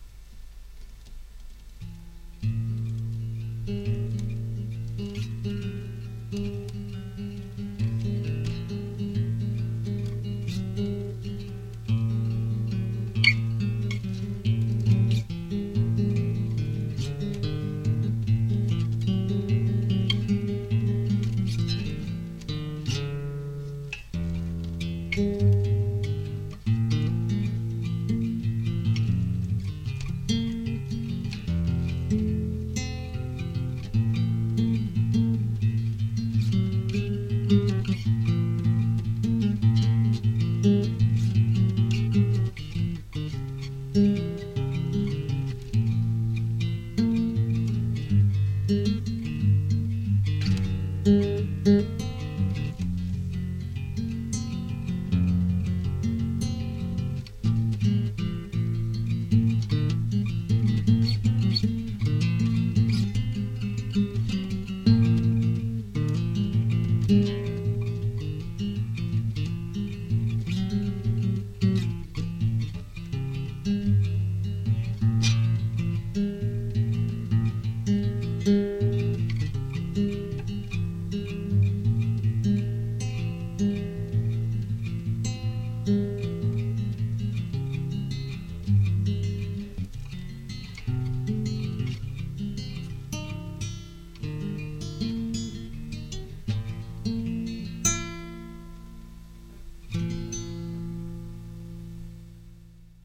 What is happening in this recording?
Yamaha C-40 acoustic guitar recording.
acoustic, clean, guitar, nylon-guitar